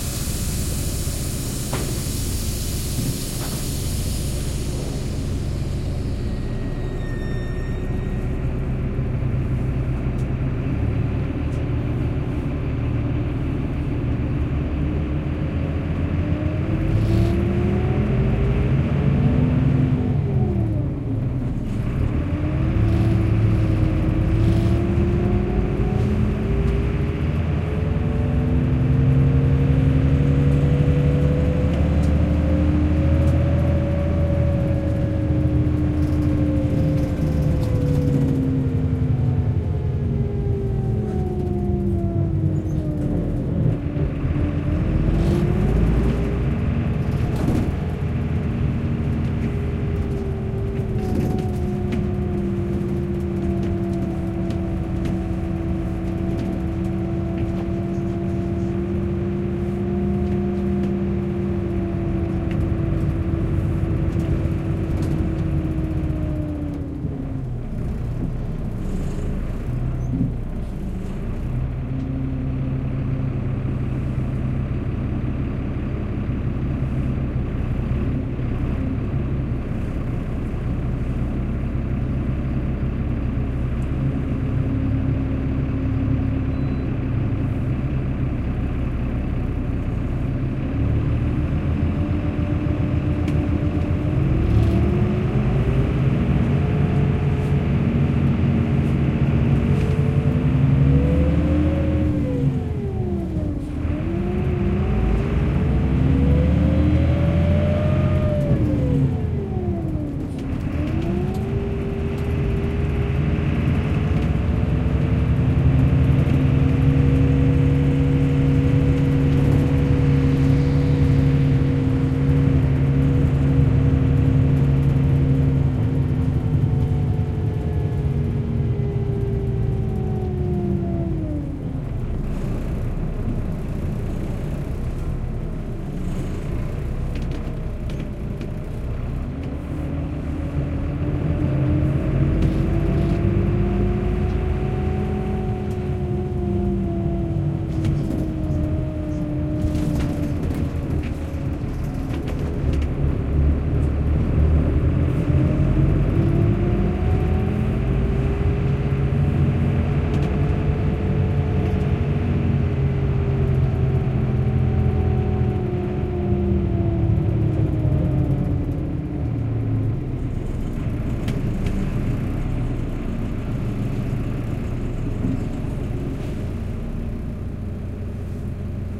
bus ride through the city from a passenger’s point of view.